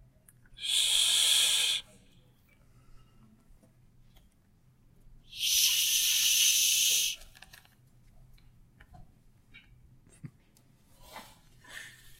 paisaje-sonoro-uem-SHbiblioteca
El bibliotecario exige silencio.